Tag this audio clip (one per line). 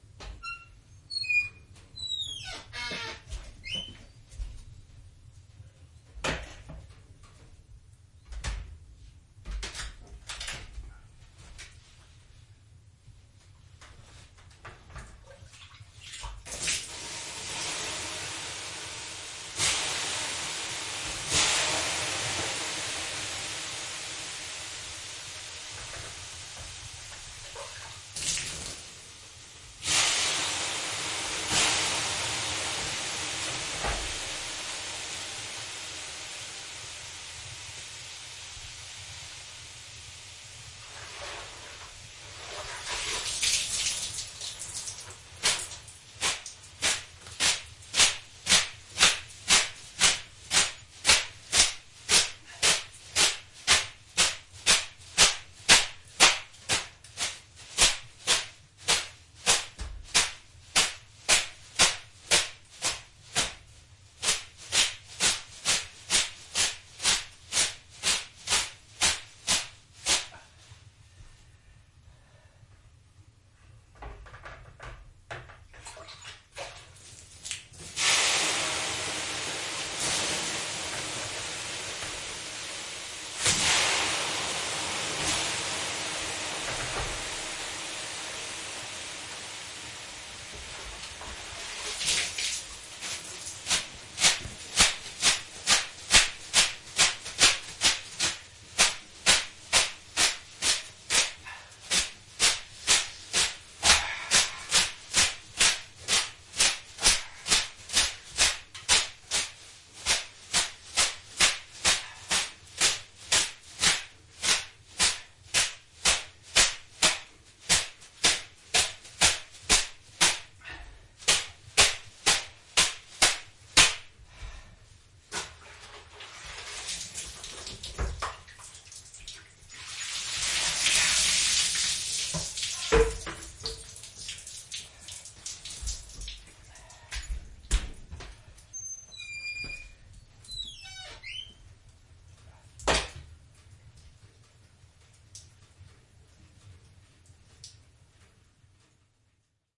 Finland
Finnish-Broadcasting-Company
Soundfx
Suomi
Tehosteet
Yle